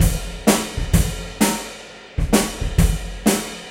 Rock beat loop 17 - pop shuffle
A simple little popping beat. Ride.
Recorded using a SONY condenser mic and an iRiver H340.